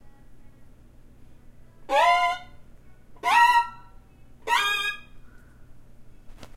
High tritone slides up
Sliding sounds on a high pitched tritone (scary interval). A scary sliding sound, or a curious/confusing sound.
Recorded on a violin using my Tascam Dr-05